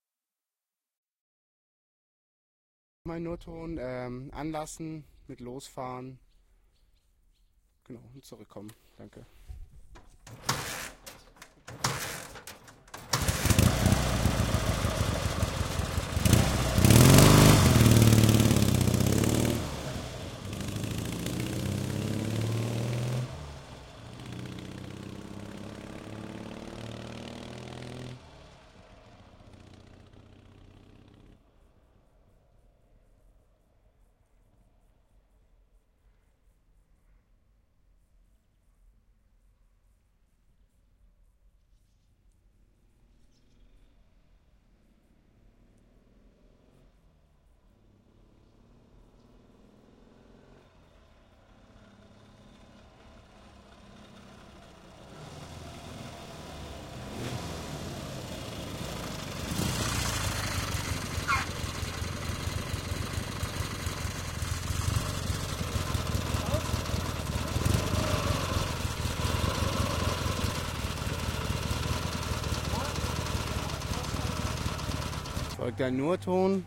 NT-G KRAD K750 003
MS Stereo, Russian Motorcycle, Start, Leave, Arrive, Stop